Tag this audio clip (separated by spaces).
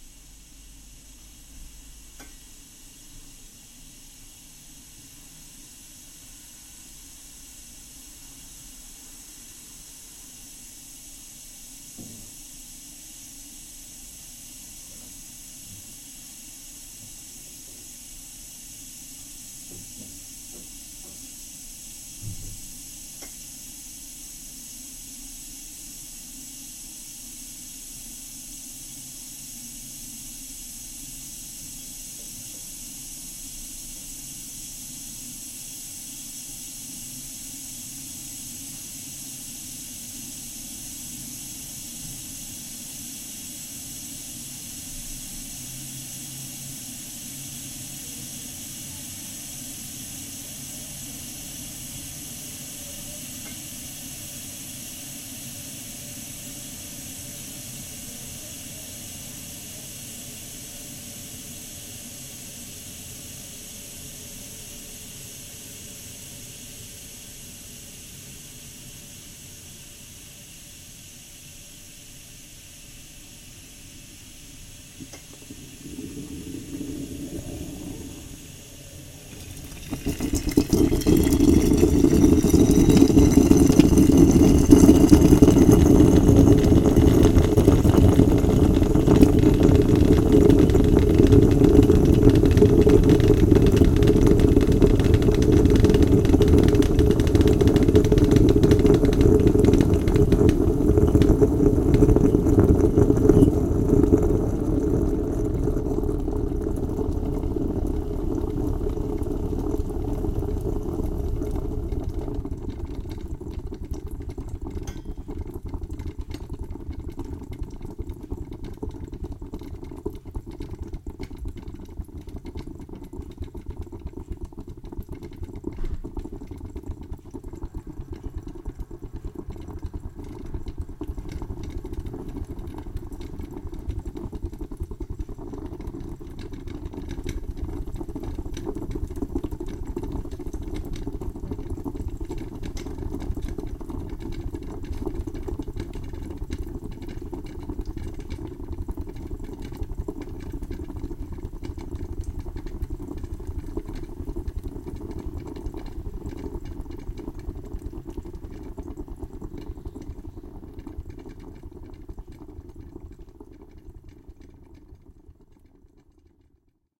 breakcoffee time waterstovecoffee